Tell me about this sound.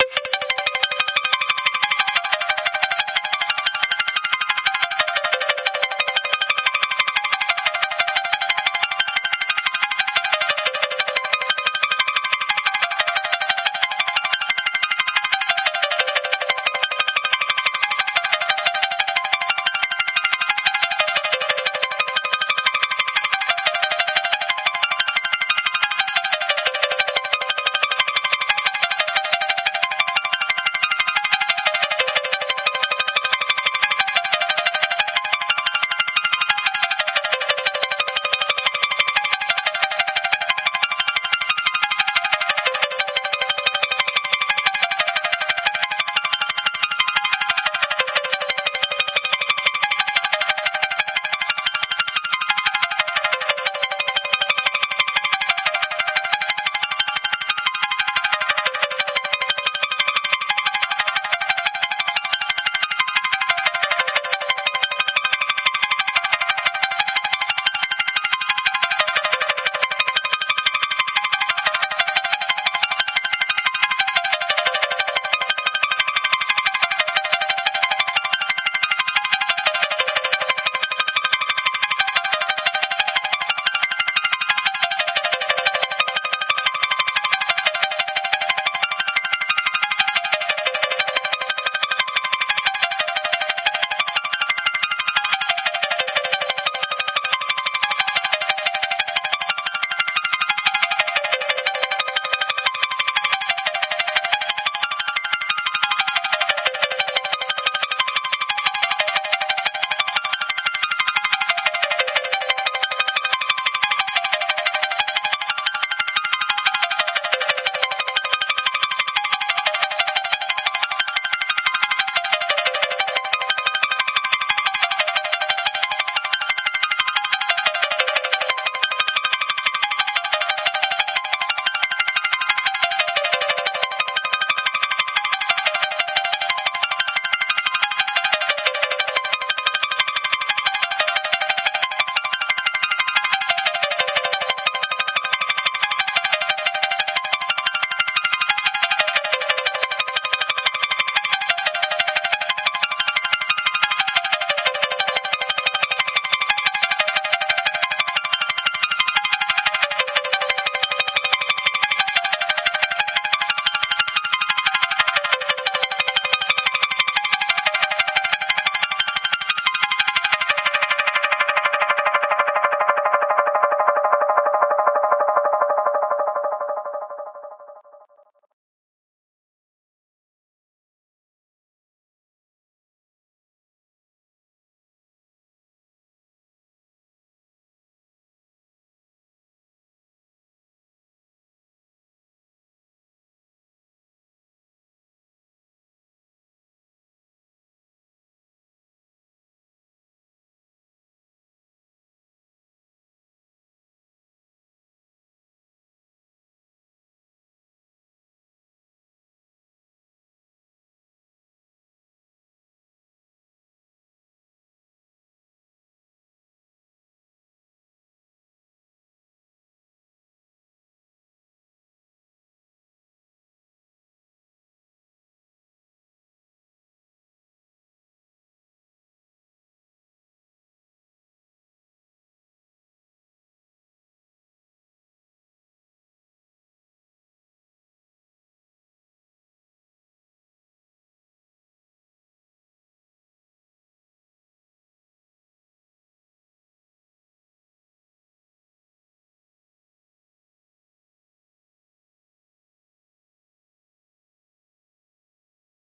Synthetic Pluck (delay)
Synthetic Pluck in Serum.
Dance, EDM, Electric